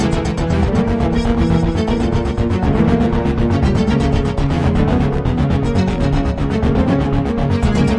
short loops 13 02 2015 2
made in ableton live 9 lite
- vst plugins : Alchemy
you may also alter/reverse/adjust whatever in any editor
please leave the tag intact
gameloop game music loop games techno house sound
games, house, gameloop, loop, music, techno, sound, game